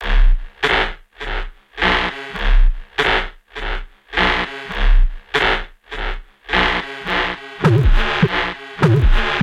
State of Emergency
A Panic Type Sound